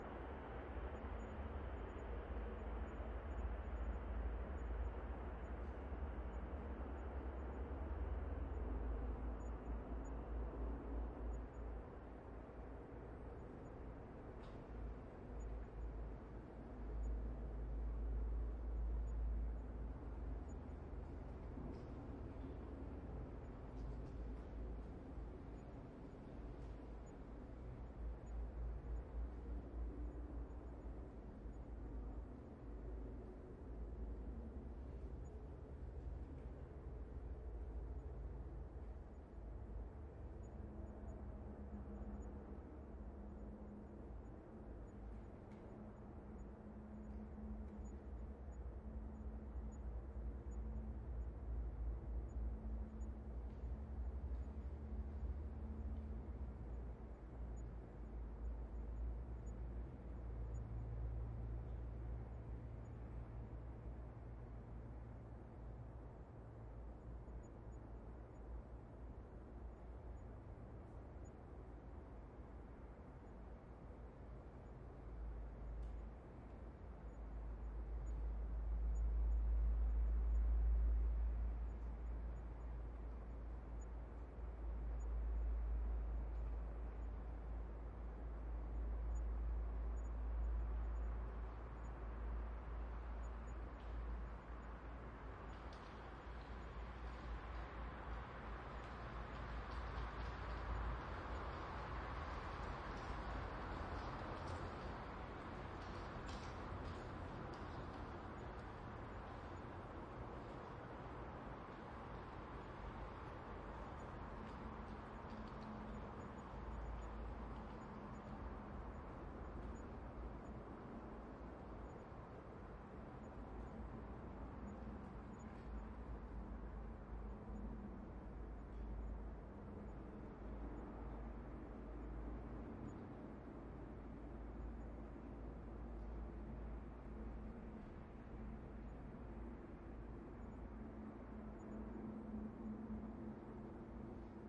ambient open bunker wind blows and road noises stereo ORTF 8040
This ambient sound effect was recorded with high quality sound equipment and comes from a sound library called Bunkers which is pack of 23 audio files with a total length of 57 minutes. It's a library recorded in different bunkers, full of room tones and water dripping from the ceiling.
ambient, atmosphere, background, blow, blows, bunker, effect, field-recording, interior, noise, room, sfx, silence, sound, tone, wind